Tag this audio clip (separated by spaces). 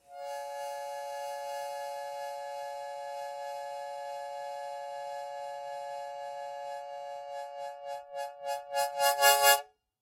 c harmonica key